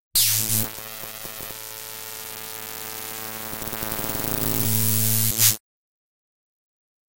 spark(fm tri-90 sin-110)
A spark sound effect. From the "things you accidentally discover" department. I was tweaking an fm envelope when I found this - and thought to save the settings. This is a combination of two effects, but the original wave was created on SoundForge's Frequency Modulator with two waves. Take a 90Hz Triangle and modulate (one over the other) it with a 110Hz Sine. 10 second period. The Triangle should be set from 0 to 75% for the first .7 sec then drop it to 20%. Build to 25% at 7 seconds. Spike to 75% and then to zero from 7 to 7.5. The sine should go from 0 to 45% from 0 to .7 seconds. Then drop gradually to zero from .7 to 10 seconds.